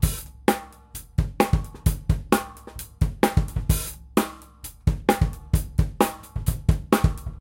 Acoustic drumloop recorded at 130bpm with the h4n handy recorder as overhead and a homemade kick mic.
acoustic, drumloop, drums, h4n, loop